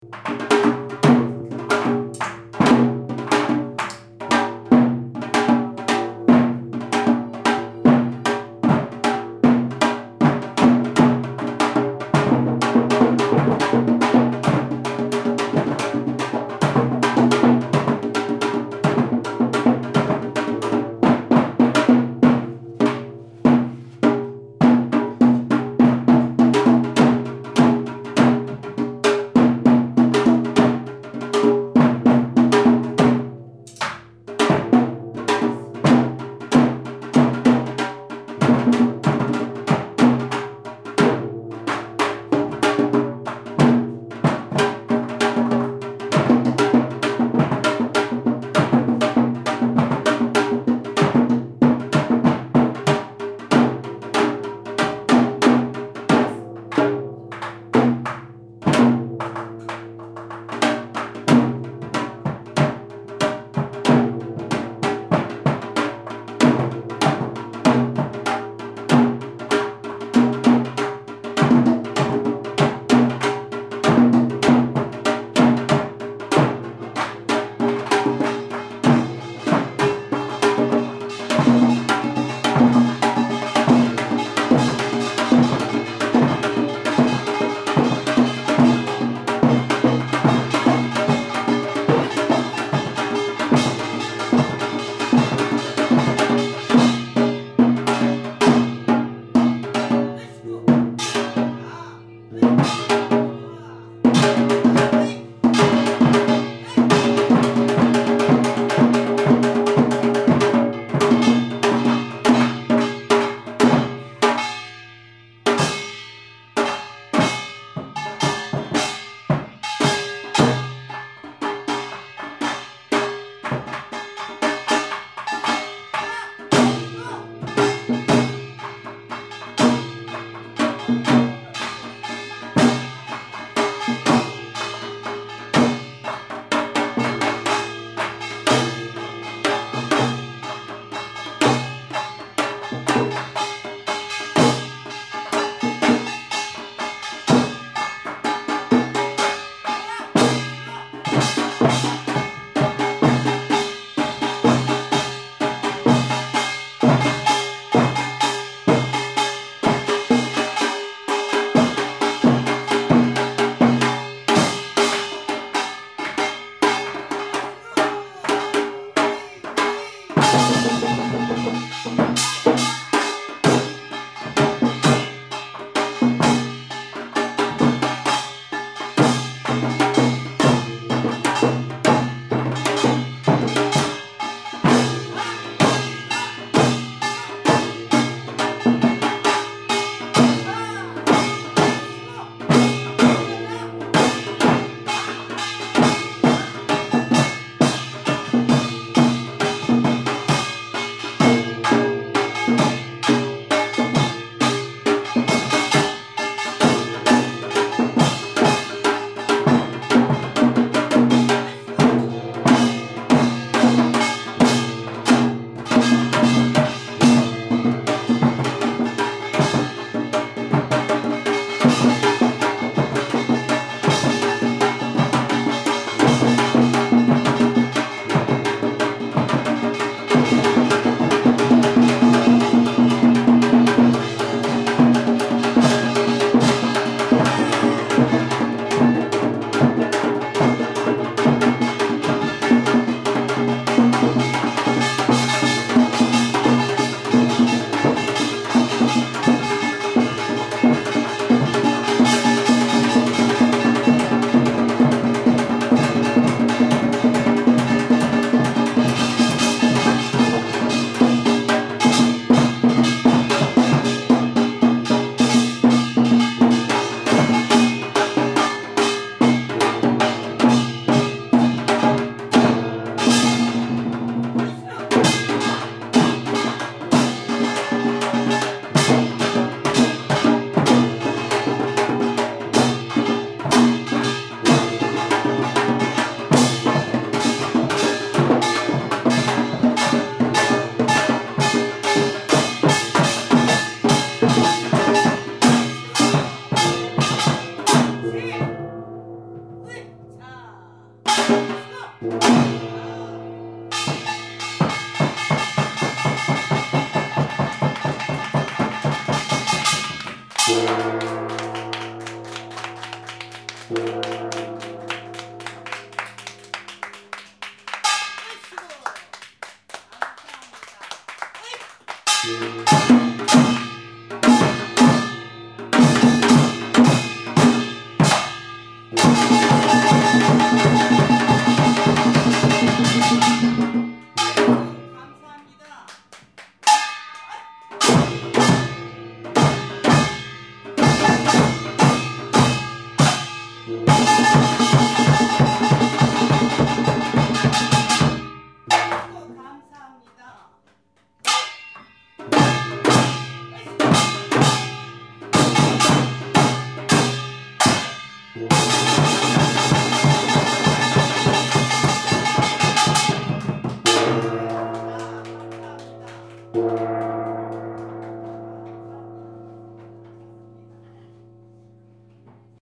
Sanchon Drum - Seoul Korea
Traditional Korean music performance (drum, voice and dance) at Sanchon, Seoul Korea.
Sony PCM D50
Korean, traditional-music, Korea, vegetarian-restaurant, dance, percussion, Sanchon, korean-language, gong, performance, drum, field-recording, costume, Seoul, South-Korea